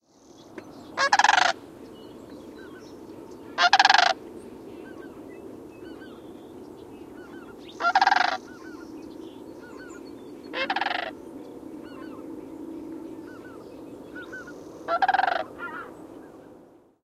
ag27jan2011t05
Recorded January 27th, 2011, just after sunset.